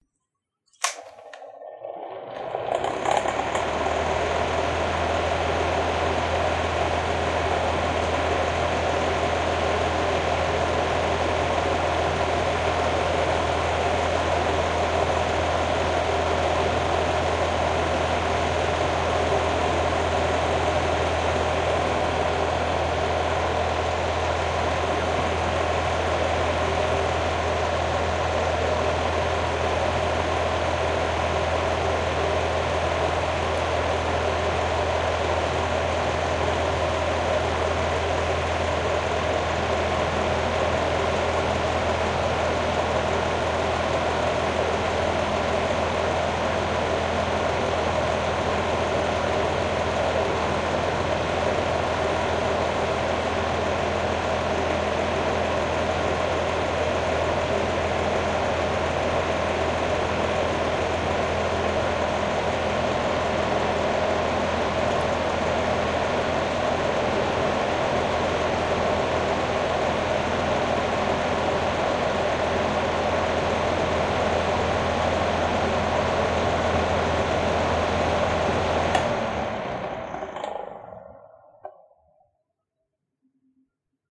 Fan heater 01
A fan heater in a bedroom.